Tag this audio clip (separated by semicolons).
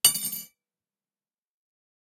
cutlery; dishes; Falling; fork; Hard; Hit; hits; Knife; knive; spoon